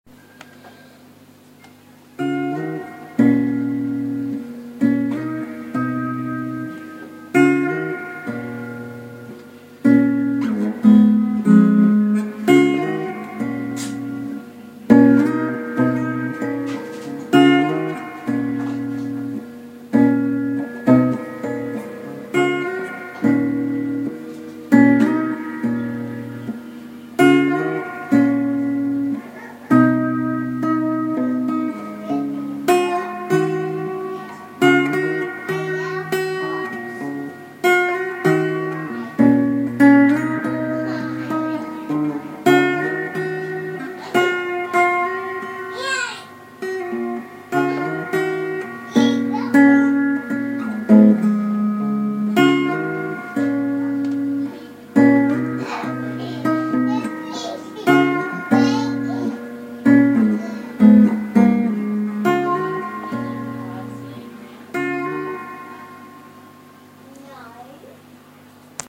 String glide
Uneek guitar experiments created by Andrew Thackray